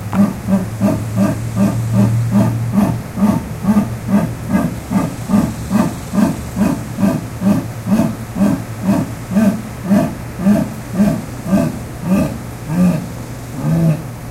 Recording of a jaguar roaring. Recorded with an Edirol R-09HR, built-in mics.